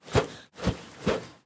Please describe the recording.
cortar aire rollo papel
air, cut